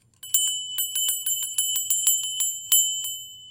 Ringing an old fashioned copper bell. Recorded with my ZOOM H2N.